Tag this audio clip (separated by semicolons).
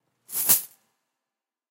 Cash; Coins; bank; Effect; Coin; Bag; Movie; short; Video; Effects; Money; Game; quick